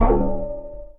A short electronic effect, could be used in a future drumkit. Created with Metaphysical Function from Native
Instruments. Further edited using Cubase SX and mastered using Wavelab.